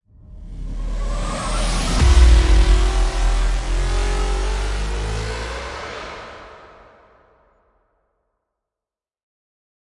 Cinematic Rise-and-Hit sound.